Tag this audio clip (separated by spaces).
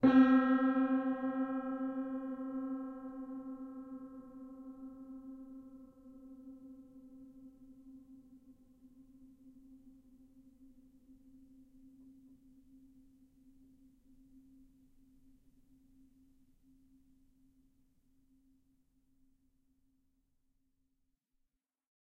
detuned horror old pedal piano string sustain